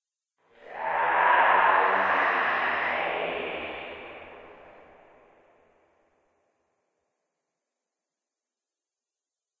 Moaning Ghost
Some kind of ghost moaning or whining. Human voice processed with a binaural simulator plugin and a slight reverb.
monster, whine, whisper, moaning, creepy, ghost, halloween, horror, whining, scary, moan, binaural